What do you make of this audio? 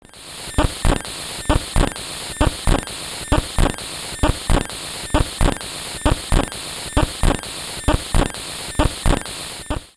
120 bpm loop of blips, beeps, white noise and clicks. Made on an Alesis Micron.
blips, static, alesis, loop, 120bpm, white-noise, synthesizer, clicks